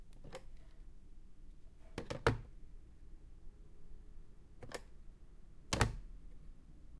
Phone, hanging up
A phone being hung up several times. I guess it was an unpleasant call.
Phone, communication, foley, soundfx, telephone